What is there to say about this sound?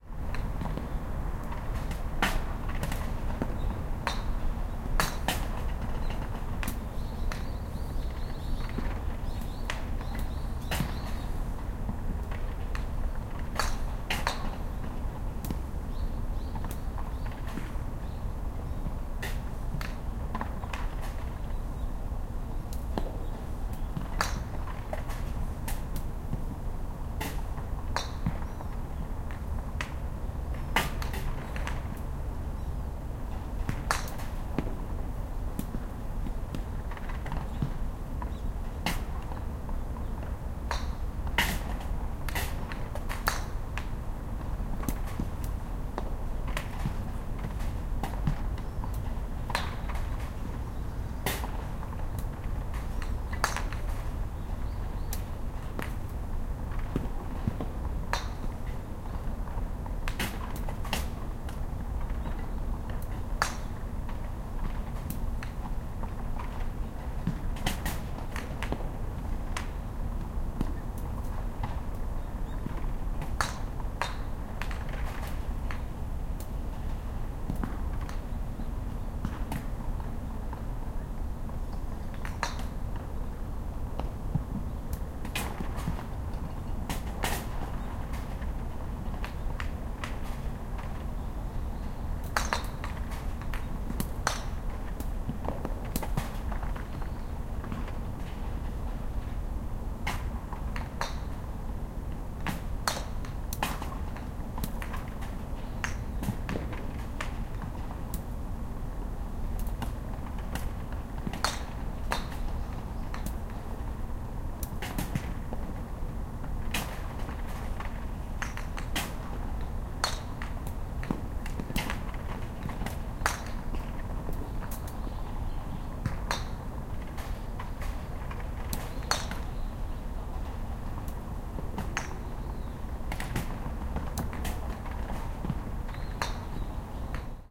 0064 Golf and birds
Playing golf, sounds of the balls and the grilles. Birds
20120116